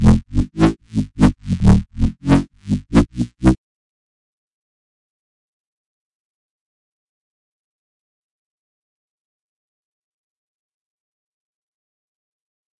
bass resample 2
crazy bass sounds for music production